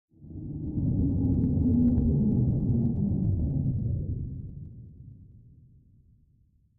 Enhanced version of my other "power down" effects. Also time-shortened a bit. Enjoy!
electricity, generator, power, power-down, shut-down